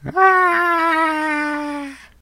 Also known as rotom_scream01, this is a sound effect I recorded and used on a few occasions...